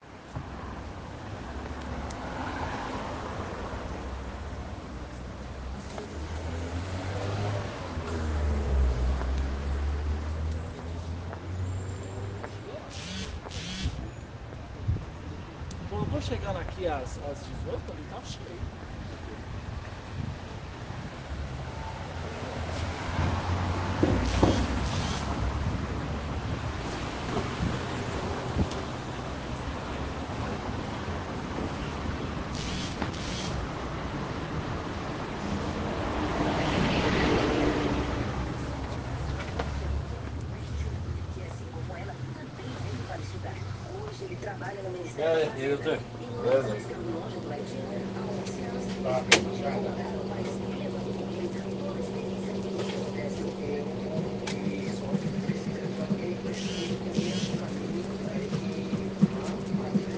street poeple ambient sound
ambient, people, street